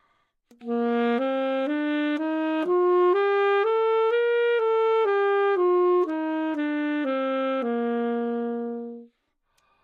Sax Alto - A# Major
Part of the Good-sounds dataset of monophonic instrumental sounds.
instrument::sax_alto
note::A#
good-sounds-id::6830
mode::major
alto, AsharpMajor, good-sounds, neumann-U87, sax